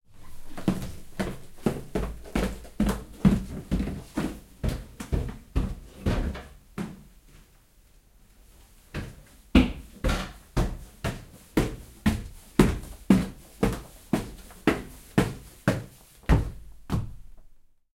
Sound of a person who’s walking down and up wooden stairs. Sound recorded with a ZOOM H4N Pro.
Son d’une personne descendant et montant un escalier en bois. Son enregistré avec un ZOOM H4N Pro.
floor, upstairs